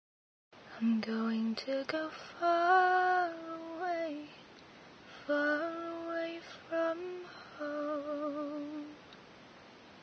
Vocal sample
A female voice singing the words, "I'm going to go far away, far away from home"
english, female, girl, music, sample, sing, singer, singing, song, vocal, voice